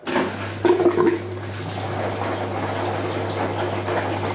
Washing machine 5 pause to drain
Various sections of washing machine cycle.